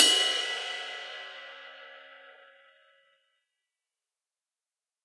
Skiba22Bell
A custom-made ride cymbal created by master cymbal smith Mike Skiba. This one measures 22 inches. Recorded with stereo PZM mics. The bow and wash samples are meant to be layered together to create different velocity strikes.